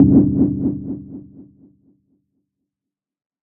Blips Trails: C2 note, random short blip sounds with short tails from Massive. Sampled into Ableton as instant attacks and then decay immediately with a bit of reverb to smooth out the sound, compression using PSP Compressor2 and PSP Warmer. Random parameters, and very little other effects used. Crazy sounds is what I do.
hardcore, club, acid, glitch-hop, electronic, electro, processed, sci-fi, 110, techno, house, random, glitch, porn-core, bounce, lead, resonance, blip, synthesizer, bpm, effect, synth, dark, rave, sound, dance, noise, dub-step, trance